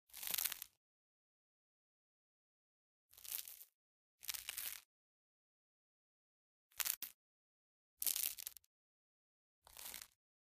bread crunch
Baked some bread and took it into the bathroom with a bunch of foam pads. Recorded with an iPhone 7 and cleaned it up in Audition for a class project. I ate the bread after so no waste there.
Use for whatever you want
If it's a big movie or something, though, consider popping some credit in because it would be pretty dope to see my name up there for bathroom bread.
bite, bread, crackle, crispy, crunch